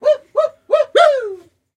This sample pack contains people making jolly noises for a "party track" which was part of a cheerful, upbeat record. Original tempo was 129BPM. This is a performer making a "whooping" sound to the rhythm of the track.
129bpm, female, male, party, shot, shots, stab, stabs, vocal, vocals